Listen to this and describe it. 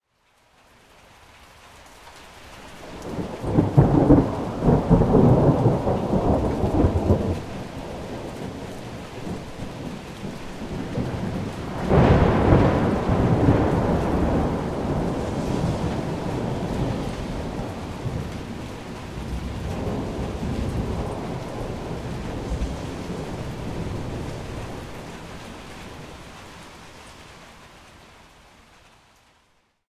Thunders and rain
Recorded in the city center by my camcorder with external microphone.
thunder, thunderstorm, lightning, thunders, rain, storm, city